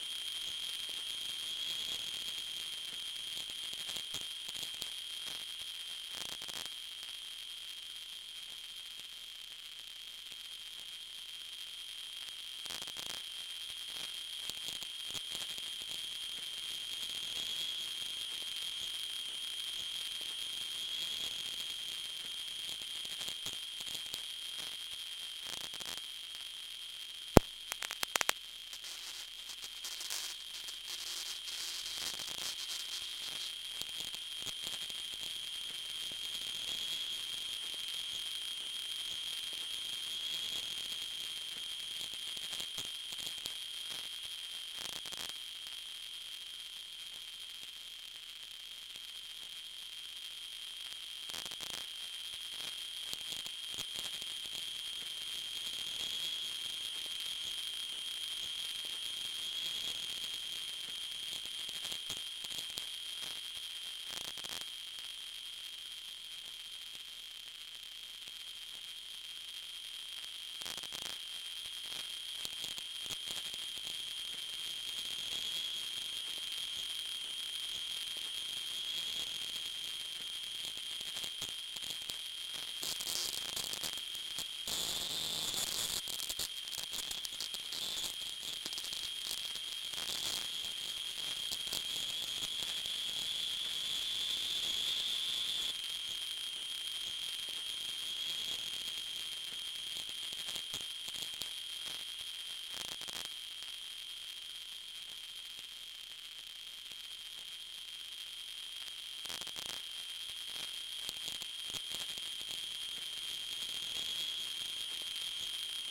sweet static sound
This is the sound of ambient hiss and click recorded from a de-tuned vintage tube (valve) radio onto ferrous magnetic tape then fed to digital via computer. It is a combination of two analogue processes. Perfect for creating authentic vintage radio's ambient hiss and click. From a 'pack' of vintage radio sounds that is going to grow and grow.